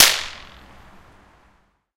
Concrete Wall Outside 2
This is a free recording of a concrete wall outside of masmo subway station :)
Outside, Masmo, Concrete, Wall